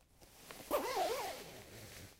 Just a little zipper collection.